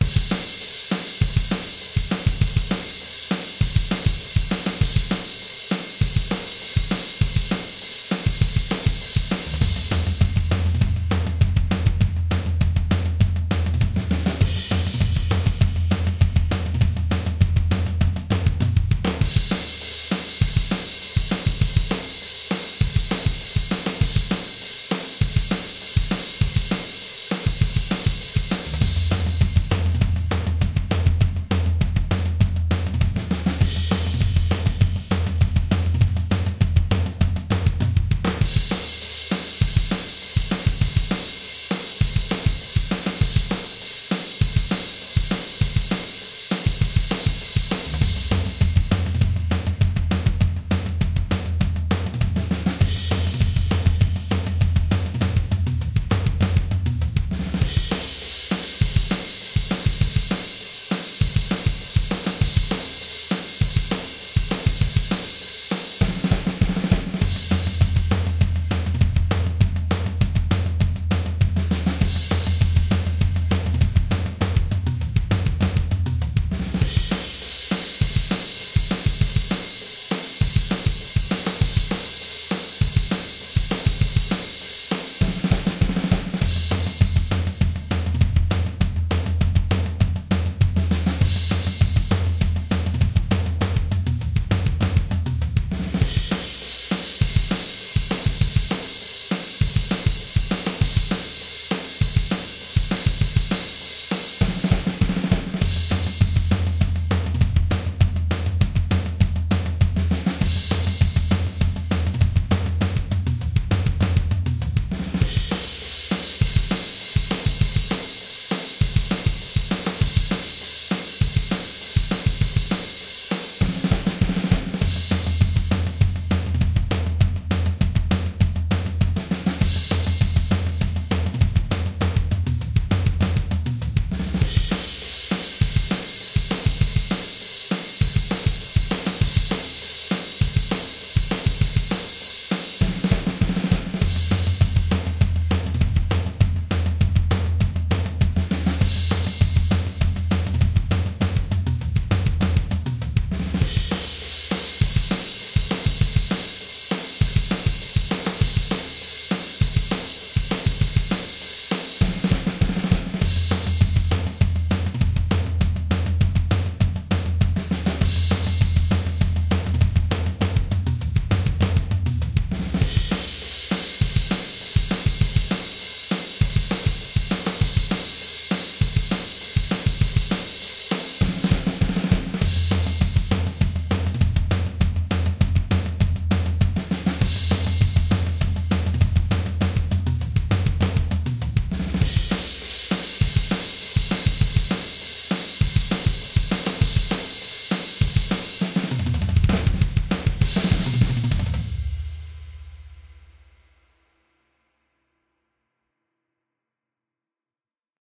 PUNK RocK Drums 200 bpm made in Garageband By Troy L. Hanson
track, backing